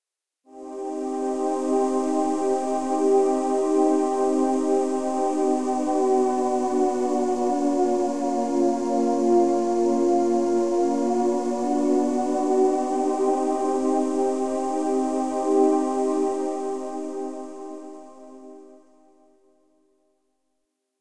made with vst instruments
sci-fi, space, scary, thiller, trailer, film, thrill, soundscape, hollywood, deep, dark, atmosphere, drama, drone, music, spooky, movie, ambience, background, pad, background-sound, suspense, horror, ambient, mood, dramatic, cinematic